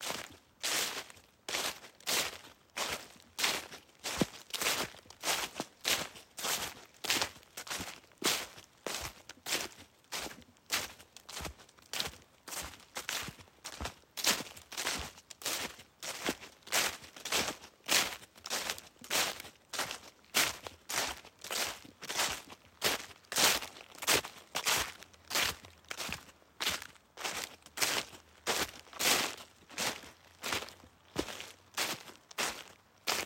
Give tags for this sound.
field-recording; footsteps; leaves; wet

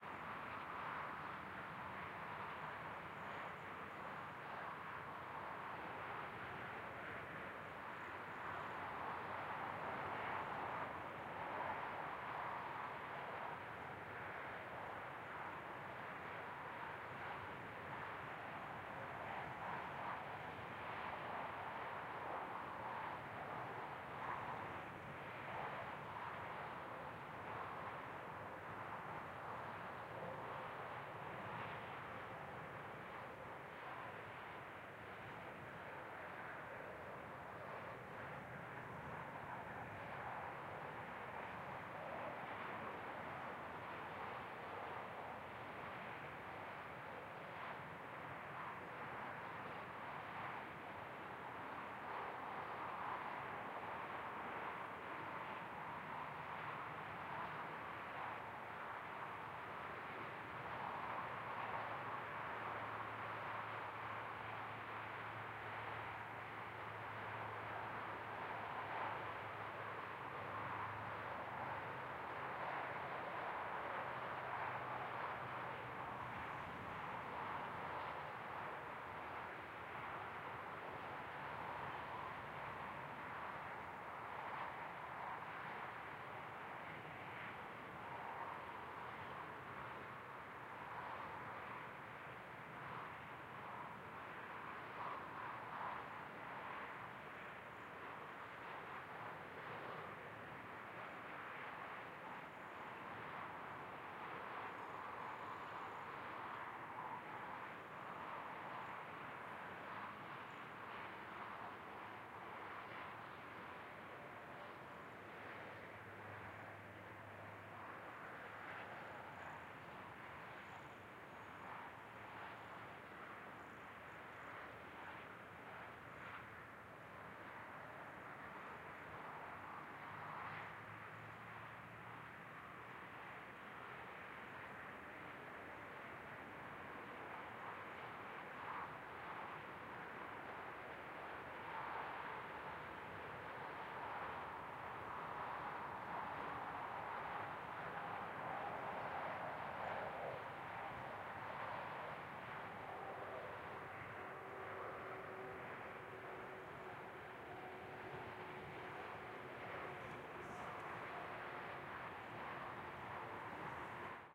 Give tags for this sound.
Highway; field-recording; cars; passby; traffic; trucks; motorbike; road

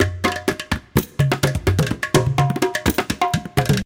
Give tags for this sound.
loop; rhythm; india; percussion

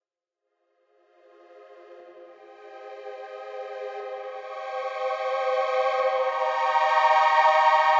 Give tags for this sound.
ambient; atmosphere; beautiful; chill; dreamy; drone; evolving; pad; rnb; smooth; soft; soundscape; synth

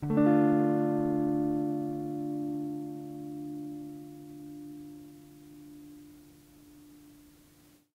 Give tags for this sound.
collab-2; Jordan-Mills; mojomills; guitar; el; lo-fi; vintage; lofi; tape

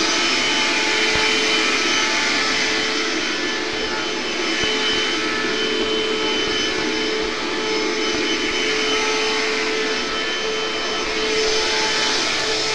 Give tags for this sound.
household; noise; vacuum

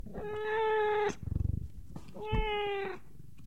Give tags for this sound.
animal; animals; cat; cats; domestic; feline; kitten; kitty; meow; meowing; mew; pet; pets; purr; purring

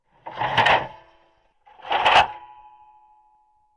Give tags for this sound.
Metal; Hit

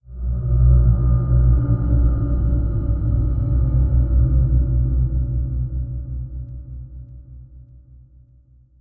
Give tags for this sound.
machine; worlds; alien; mechanical; war

